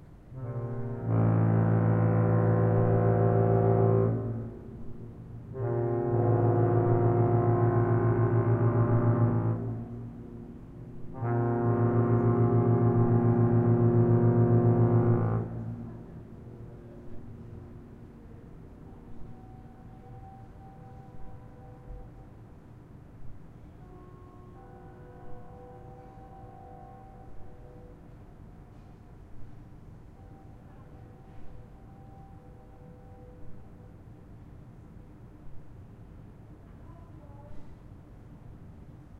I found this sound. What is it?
Queen Mary 2 II
I recorded this track with a Zoom H4 on St. Pauli, Hamburg backyard 700 m away
mary, harbour